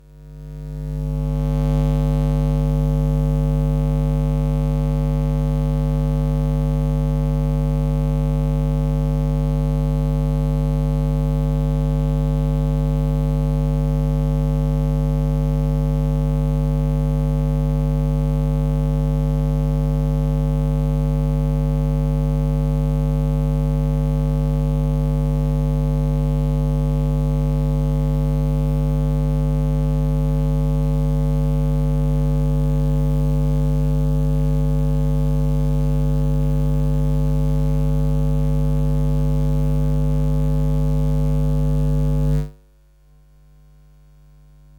EMF CD player low freq hum
This sound effect was recorded with high quality sound equipment and comes from a sound library called EMF which is pack of 216 high quality audio files with a total length of 378 minutes. In this library you'll find different sci-fi sound effects recorded with special microphones that changes electro-magnetic field into the sound.